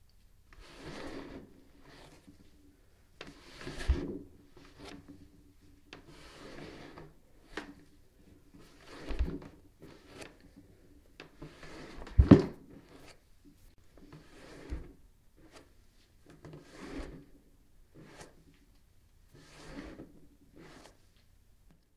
Leaning on Counter
A body rubbing against a wooden table with arms hitting intermittently.
body, table, movement, lean, counter, against